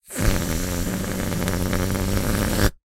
Party Pack, Balloons, Deflate, Moderate, 03-02
An inflated rubbed balloon being deflated with a farting sound. A sound from one of my recent SFX libraries, "Party Pack".
An example of how you might credit is by putting this in the description/credits:
And for more awesome sounds, do please check out the full library or my SFX store.
The sound was recorded using a "Zoom H6 (XY) recorder" and "Rode NTG2" microphone on 7th June 2019.
Party, Air, Pack, Balloons, Deflating, Squeeze, Deflate, Balloon